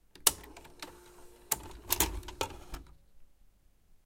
VHS Cassette Eject
Ejecting a VHS cassette from a Philips VR6585 VCR. Recorded with a Zoom H5 and a XYH-5 stereo mic.
machine, vcr, player, recorder, vhs, mechanical, cassette, tape